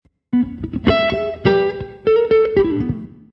Jazz guitar #7 109bpm
A blues in E lick played on guitar